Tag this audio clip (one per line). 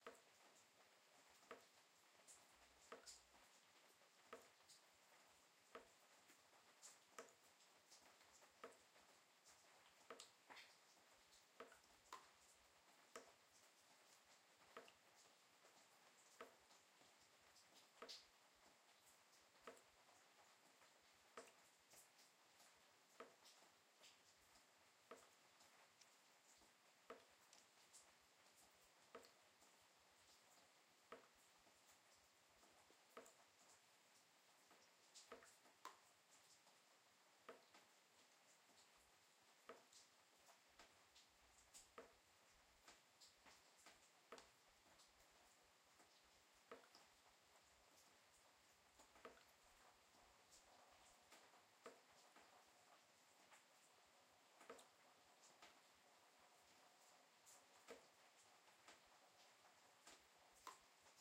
leak
rythm